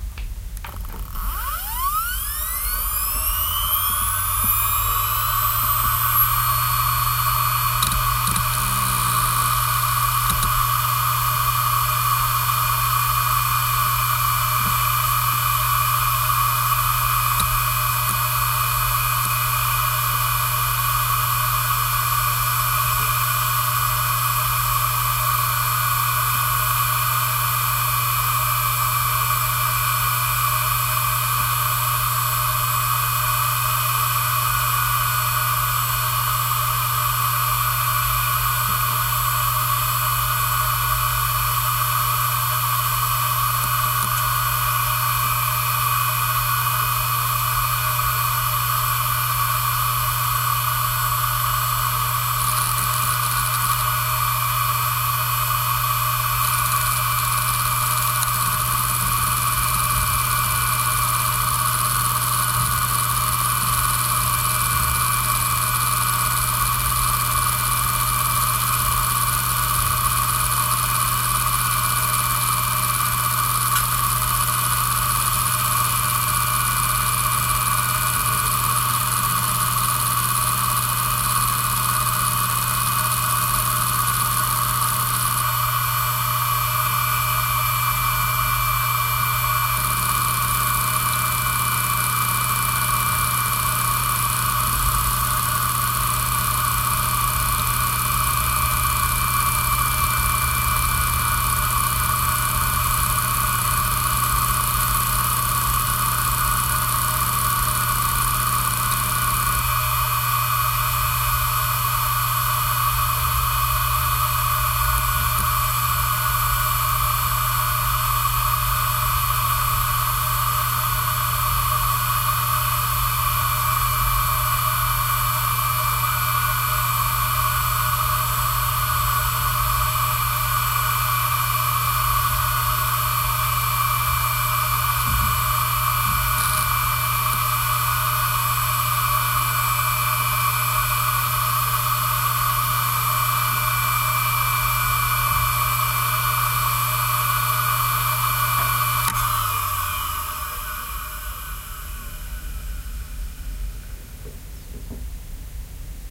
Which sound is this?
Sounds of an old hard disk drive from 1999 (IBM, model DJNA-371350, 13,5 GB). You hear starting of the spinning noise and the heads rumbling when data is read and written. I started a short defragmenting to make the disk noisy.
Recorded with a Roland R-05